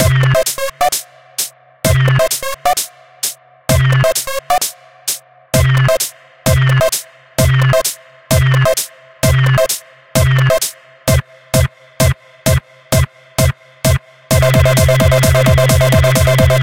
Rumma Beat music
hiphop, download, sfx, loop, music, edm, sound, theme, beat